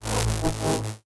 Whoosh Electric 01
An abstract electric whoosh sound to be used in sci-fi games, or similar futuristic sounding games. Useful for tuning in on a screen, or some other similar visual static noise-ish effect. Sounds a bit like an insect buzzing.
sound-design, strange